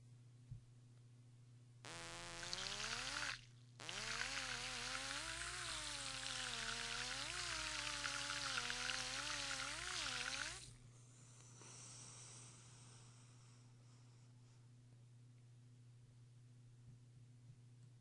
champagne degass
Start electrical miniwhip in glass of champagne, run whip, stop, sound of bubbles that leave
jobs, home, office